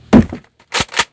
A shotgun shooting and reloading.
I made it by slamming on a cardboard box and cocking a toy gun.
Yeah. Y'know.